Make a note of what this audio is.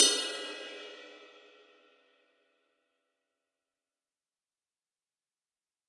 KZildjianIstanbul20Ride2220GramsSkibaModifiedBell
Sampled from a 20 inch K. Zildjian Istanbul ride from the 1950s, and subsequently modified by master cymbal smith Mike Skiba for a final weight of 2220 grams. Recorded with stereo PM mics.This is a hard stick tip hit on the bell or cup of the cymbal.
skiba, cymbal, percussion, zildjian, vintage, istanbul, drums, ride